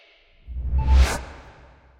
HUD-OPEN01
beep, bleep, blip, click, event, game, hud, sfx, startup